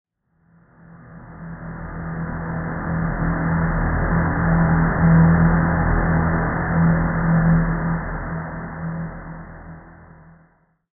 Pad sound with a slightly guitar-like tone.